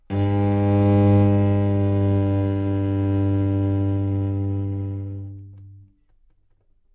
Part of the Good-sounds dataset of monophonic instrumental sounds.
instrument::cello
note::G
octave::2
midi note::31
good-sounds-id::4441
Intentionally played as an example of bad-pitch